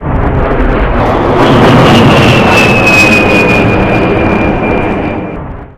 A-10 Thunderbolt "Warthog" Jet Flyby
An A-10 Thunderbolt military jet flying past the camera.
gatling-gun, gunnery, fly-by, air-battle, attack, military, Warthog, gun-fire, us-army, aviation, game-sound, flight, air-force, flyby, A-10-Thunderbolt-II